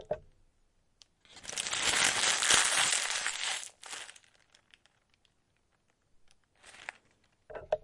Paper Crumple (long)
Longer version of a Baker Bag being crumpled, recorded with H4N.
bakery, bread, bag, bun, crumple, paper